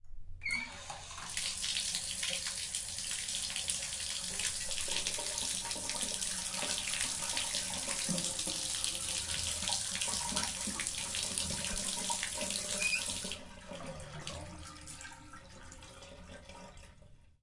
Tub Faucet
Elaine, Field-Recording, Koontz, Park, Point, University